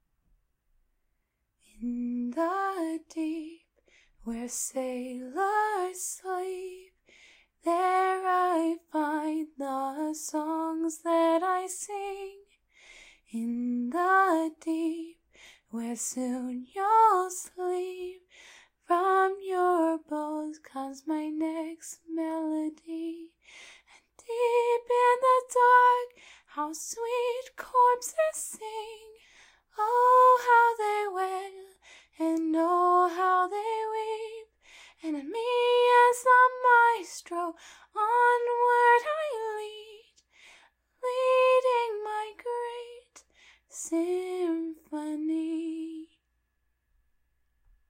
Siren Song
I little tune I came up with one day but I don't plan on developing any further.
sing, song, siren, singing